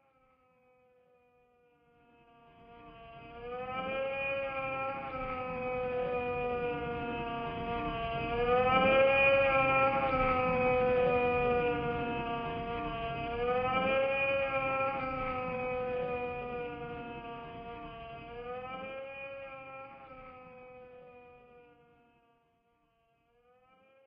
A man cries out in anguish - a sound I made from a simple vocal sample with granular synth processing to stretch and repeat - part of my Strange and Sci-fi 2 pack which aims to provide sounds for use as backgrounds to music, film, animation, or even games.
fear, music, pain, processed, cry, voice, siren, electro, man, anguish, ambience